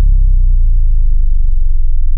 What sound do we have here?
Sub Rush 3
bass, big, boom, cinematic, dark, design, low, rush, sample, sound, sub, tuned, wobble